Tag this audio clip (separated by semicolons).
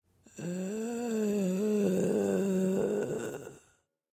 Moan; Zombie